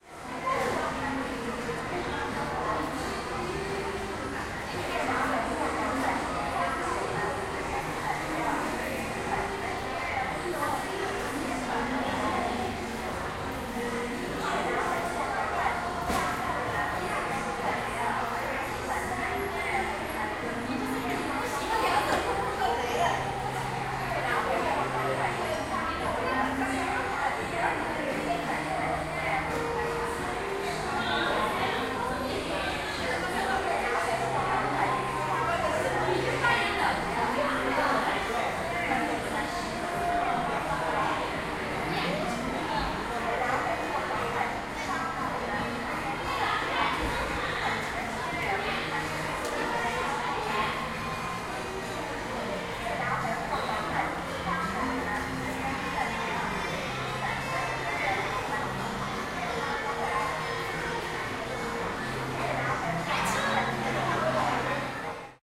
huinan mall 1
This is a shopping mall in suburban Shanghai on a weekday afternoon. There are the sounds of music, electronic announcements and passerby.
store, Nanhui, mall, suburb, Shanghai, field-recording, Chinese, music, China, voices, Asian, shopping, ambience, park, shoppers